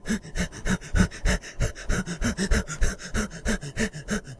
Male Fast Breathing 01
fast, human